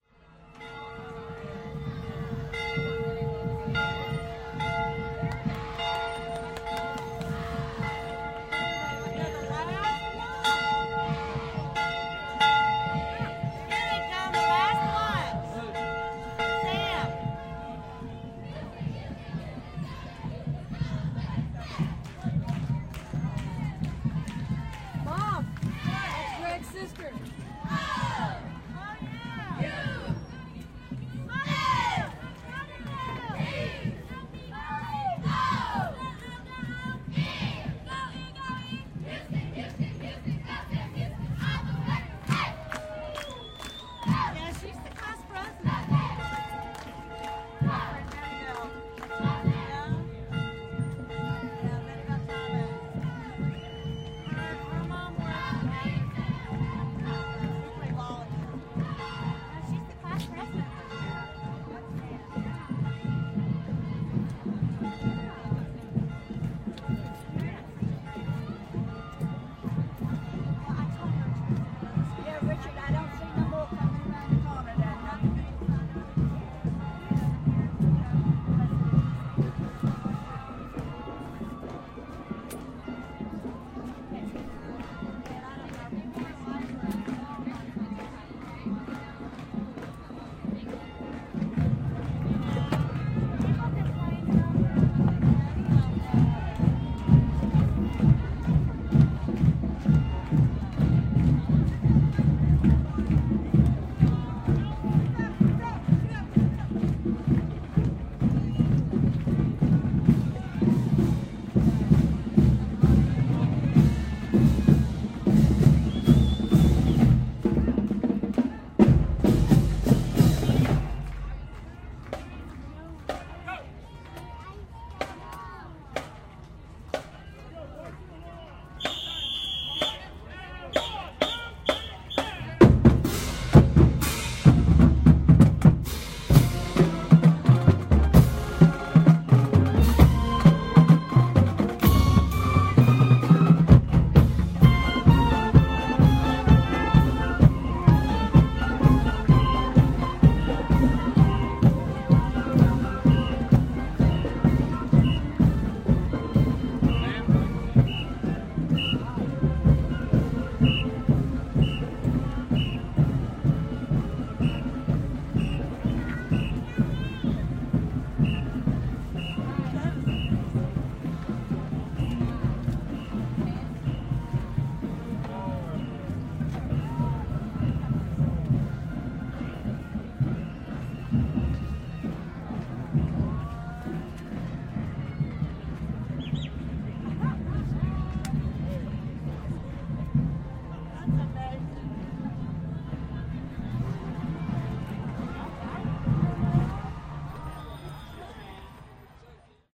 4th of July parade. Arlington Texas 2006. Sam Houston High School passes. First the cheerleaders pass, then the marching band playing "Stars and Stripes Forever" - John Philip Sousa. Mono recording. Used ECM-99, but had bad cord, so only one channel recorded to SonyMD